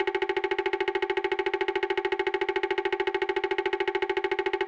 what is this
Text Scroll G4 200 OpenMPT (Woodblock)
A sound made in OpenMPT using the "Woodblock" sound sample that could be used during scrolling text.
scroll, dialog, speak, voice, dialogue, percussion, speaking, text